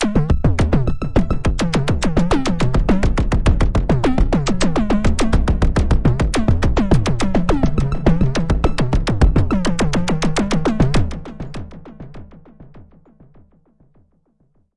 130 BPM arpeggiated loop - E5 - variation 2
This is a 130 BPM 6 bar at 4/4 loop from my Q Rack hardware synth. It is part of the "Q multi 005: 130 BPM arpeggiated loop" sample pack. The sound is on the key in the name of the file. I created several variations (1 till 6, to be found in the filename) with various settings for filter type, cutoff and resonance and I played also with the filter & amplitude envelopes.